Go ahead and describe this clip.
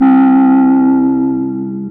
Weird alien sound
Created this by messing around with the pickbass preset on fl studio's harmor.
alien, fl-studio, harmor, spacy, weird